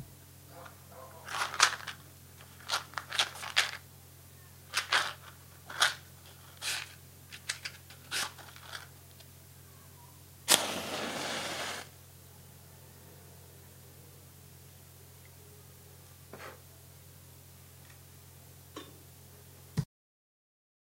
Matches / Cerillos
lighting a match